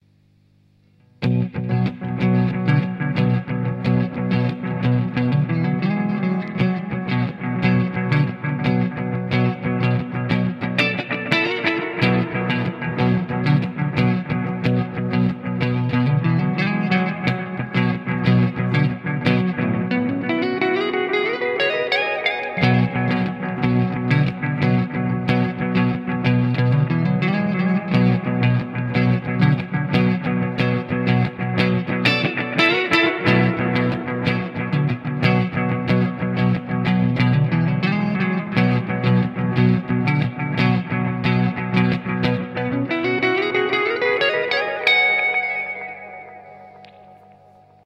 this is quickly electroguitar sketch, were I play interesting riff whith using guitar pedals: Delays - Yojo digital delay and Electro-harmonix memory toy, Reverb - Boss frv 1. Through hand-made tube amp, dynamics VOX and microphone AKG perception 100 and audio cart Presonus INSPIRE.
Key - Bm.
Tempo - 90 bpm.